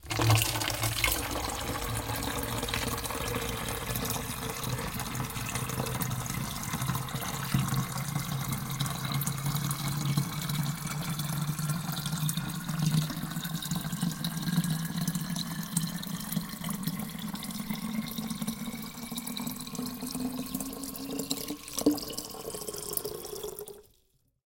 04 Filling Bottle
Water bottle being filled
CZ, Plastic, Water, Bottle, Czech, Bubble, Panska